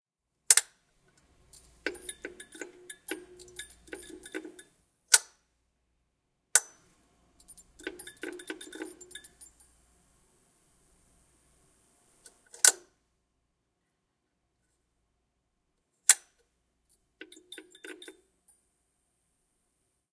Light switch fluorescent flickering electric bulb BANFF 190107
Fluorescent light fixture flickering on and off.
flicker, fluorescent, light, switch